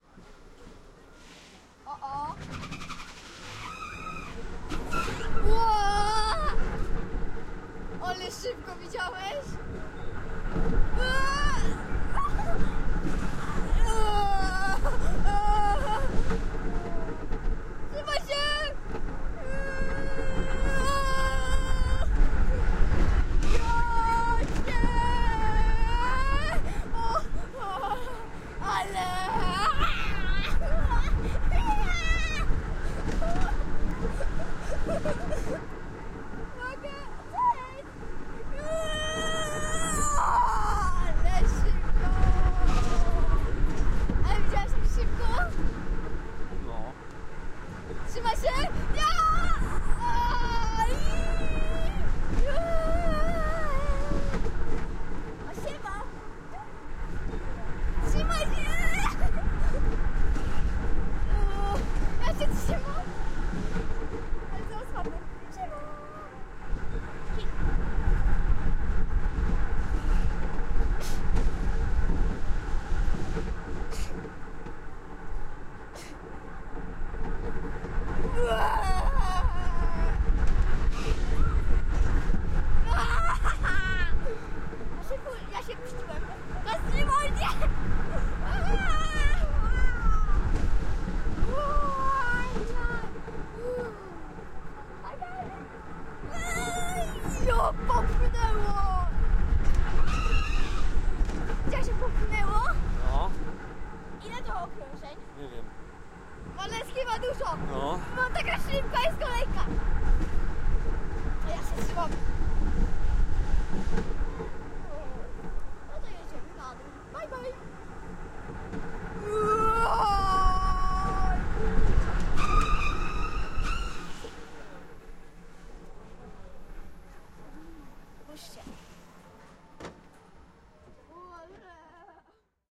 carousel, train, carriages, in line at an amusement park, holiday wine, walk, market, mall, Poland
amusement, carousel, carriages, holiday, line, mall, market, park, Poland, train, walk, wine